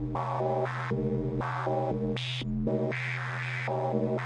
sound of my yamaha CS40M